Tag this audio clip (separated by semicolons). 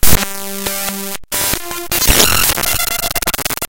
databending; glitch; unprocessed